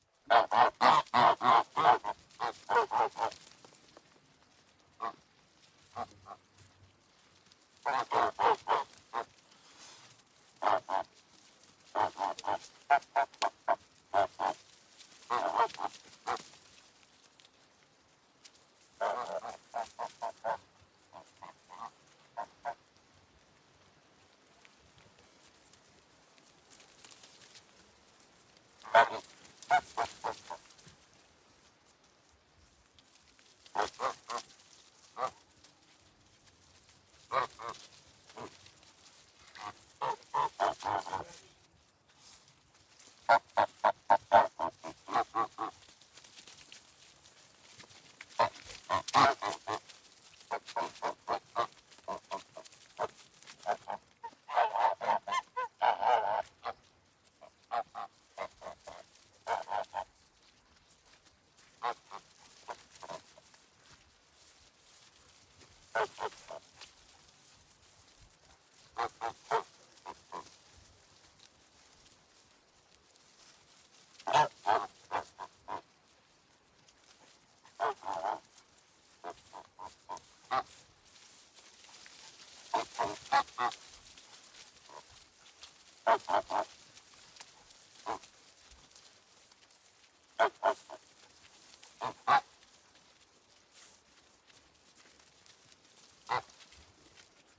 Geese walking & honking
A group of geese honking while walking on wet grass.
Schoeps CMIT 5u/MK8 ->Sound Devices 702t
honking, walking, goose, nature, outdoors, geese